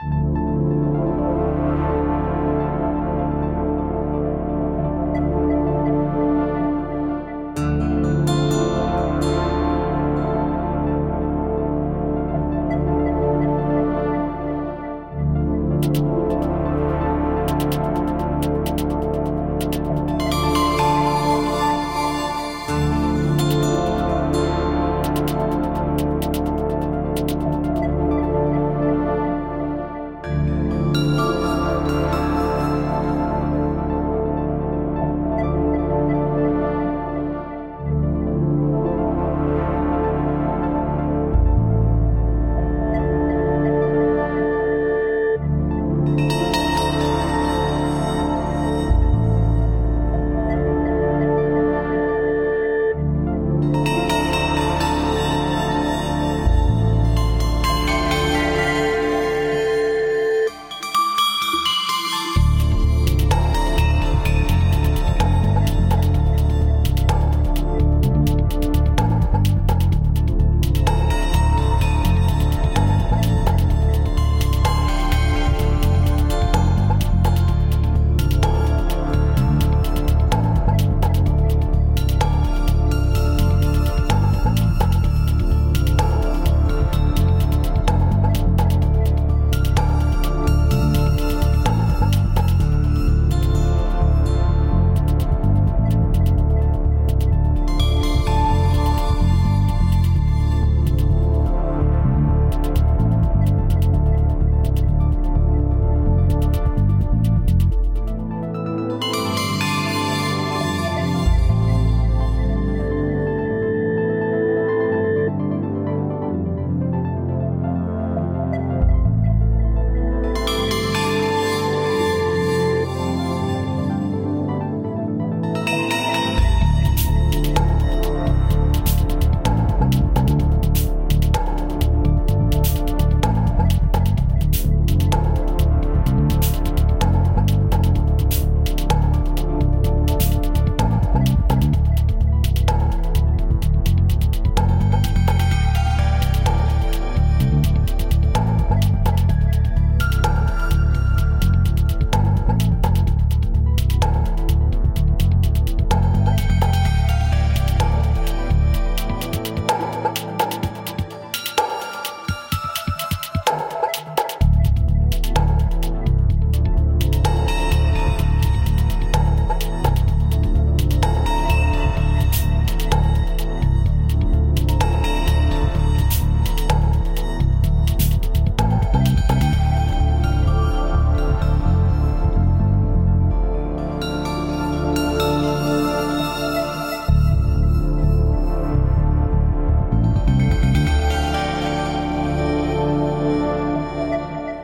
Ambience composition
Homemade ambient composition made and mastered in ableton. All instrument used are software. Used an APC40 to jam.
music, atmosphere, composition, first, ambience, synth, processed, sci-fi, electronic, ableton, digital